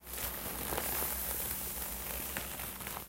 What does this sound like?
Roce de ropa
clothes, foley, undress